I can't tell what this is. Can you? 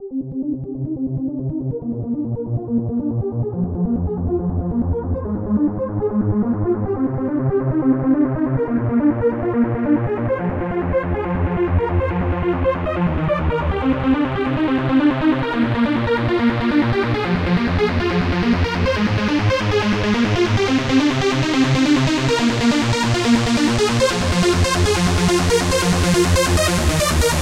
The Light 2
Compressed effect on synth sequence. Its supposed to pan from left to right but you can add that effect on your own if you want.